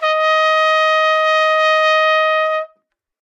trumpet-dsharp5
Part of the Good-sounds dataset of monophonic instrumental sounds.